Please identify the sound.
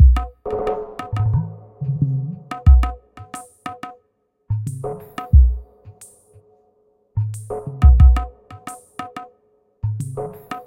Produced for ambient music and world beats. Perfect for a foundation beat.